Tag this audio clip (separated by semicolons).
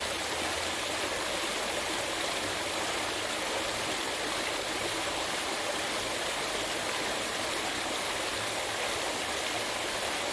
beach; coast; Cyprus; field-recording; ocean; people; sea; seaside; shore; water; waves